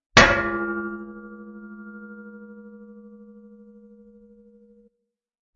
Cartoony Clang #3
A Cartoony Clang
cartoony, cartoon